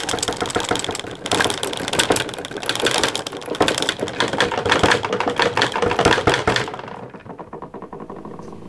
Sounds For Earthquakes - Stuff on Table
I'm shaking a table with random stuff on it. Recorded with Edirol R-1 & Sennheiser ME66.
stutter, earthquake, falling, shudder, moving, earth, collapse, rumbling, closet, shaking, suspense, movement, waggle, shaked, stirred, noise, rattle, stuff, shake, rattling, quake, motion, collapsing, rumble